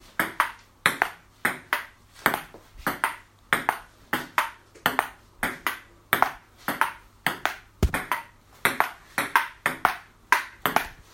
Ping pong rally